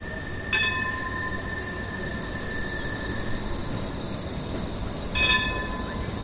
FXSaSc Berlin Tram Train Ring Bell Alexanderplatz
Berlin Tram Train Ring Bell Alexanderplatz
Recorded with Zoom H6 XY (only Right Channel)
Alexanderplatz, Bell, Berlin, Ring, Train, Tram